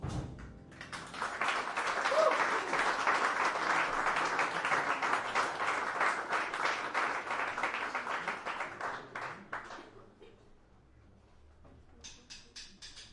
261110 - Budapest - Jazz Club
Applause during jazz concert in Budapest jazz club.
applaud, applause, hand-clapping, audience